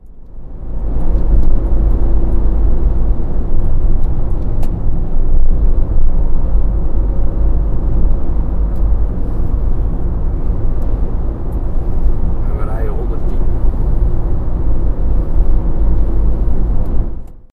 Driving at a speed of 110 kmph in a Citroën Berlingo Multispace 1.4i with a recording Edirol R-09 on the seat next to me, the driver.
engine, field-recording, noise, street-noise, traffic